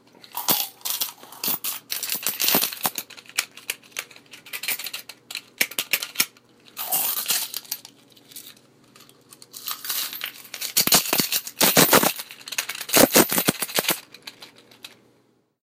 coins being shaken around and loaded into paper sleeves
sorry, it clips a little bit
bank, cash, clipping, coins, distorted, foley, money